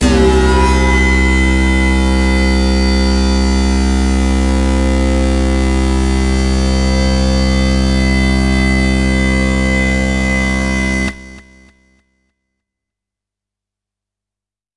Leading Dirtyness - C2
This is a sample from my Q Rack hardware synth. It is part of the "Q multi 009: Leading Dirtyness" sample pack. The sound is on the key in the name of the file. A hard, harsh lead sound.
electronic, hard, harsh, lead, multi-sample, synth, waldorf